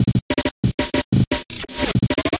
Glitch looped drum pattern made by digital tracker
Please check up my commercial portfolio.
Your visits and listens will cheer me up!
Thank you.